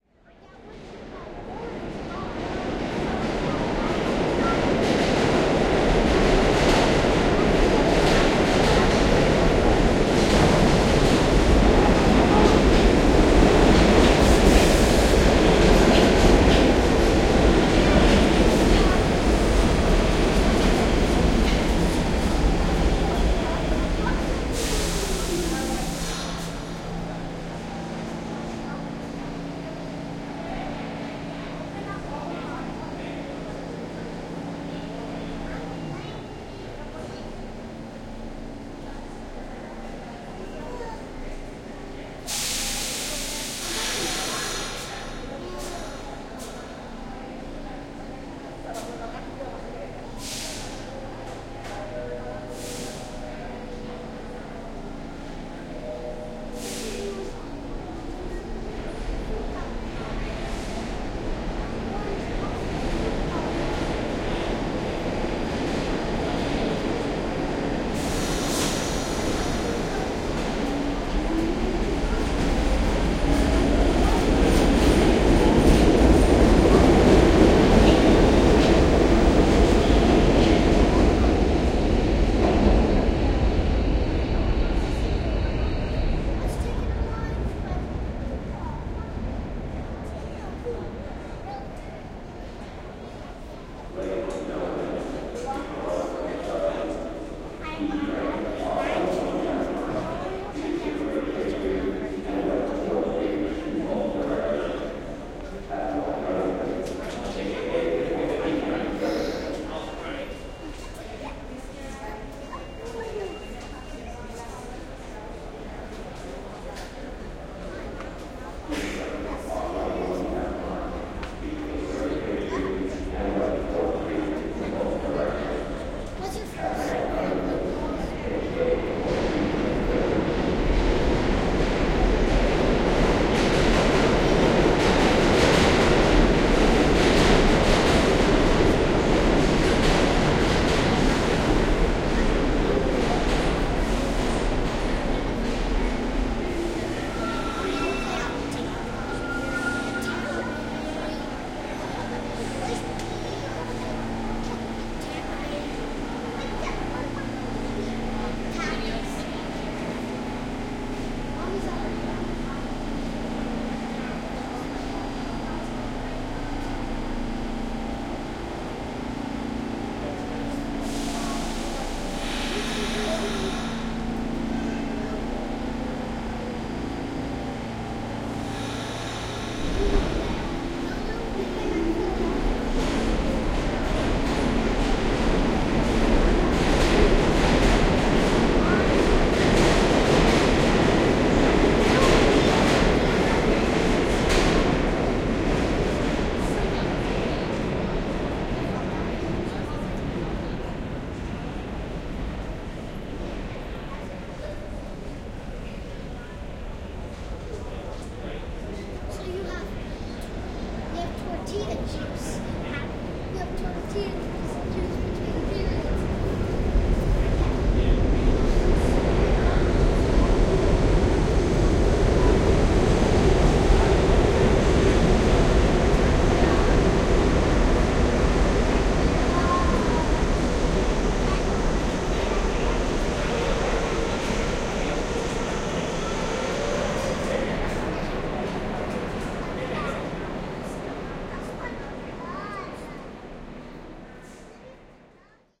Subway, passing, brake squeaks, annoucements, NYC (June 2012)
Passing subway, NYC. Sony M10. 2012.